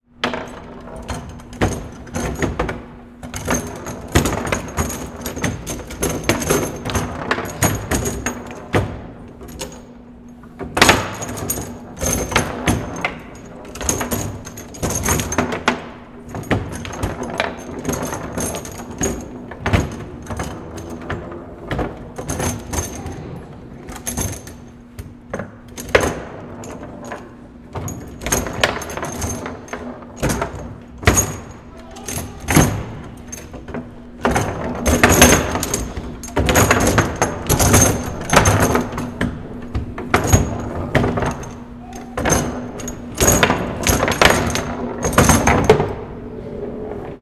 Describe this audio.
Foosball Table
(Recorded in an empty child's party salon so room tone is present as well as some voices)
FoosballGame, FoosballTable